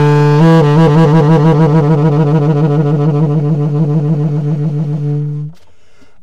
TS tone trill d2
The format is ready to use in sampletank but obviously can be imported to other samplers. The collection includes multiple articulations for a realistic performance.
sampled-instruments, woodwind, vst, jazz, sax, saxophone, tenor-sax